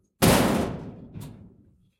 med-metal-hit-01
Metal hits, rumbles, scrapes. Original sound was a shed door. Cut up and edited sound 264889 by EpicWizard.
hit, blacksmith, shiny, iron, shield, lock, rumble, percussion, pipe, ting, clang, scrape, steel, metal, rod, hammer, impact, nails, industrial, industry, factory, metallic, bell